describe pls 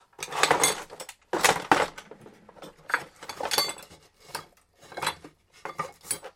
Tools metal metalic rummage looking search toolbox 2
Rummaging through toolbox to find somethine
industrial, machine, machinery, tool, toolbox, workshop